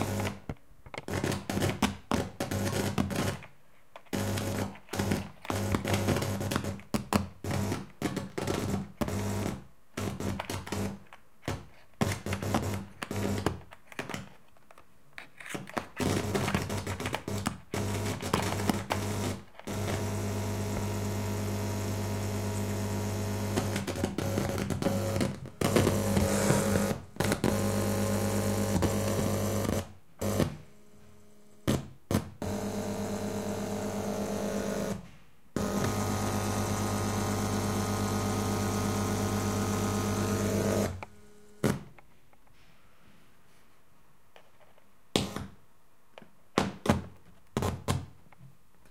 lose electrical connection
loose electrical connection.
electrical, connection